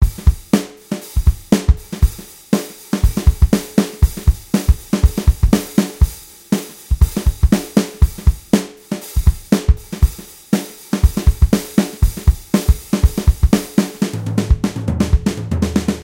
drum loop.120bpm
use this loop in 120bpm. created in fruity loops 11 by me
dogantimur, snare, bit, erkan, studio, hard, kick, recorded, medium, floor, sample